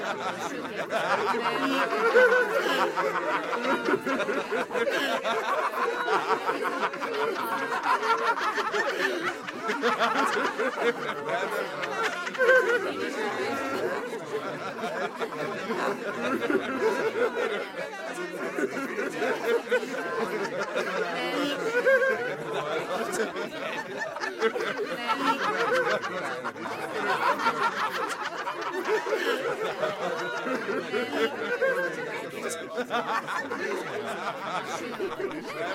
granular laughing CsG
designed from walla
granular, laugh